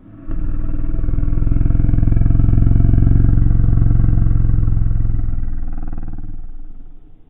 Monster Low Roar
A low monster roar.
fantasy, low, creature, roar, monster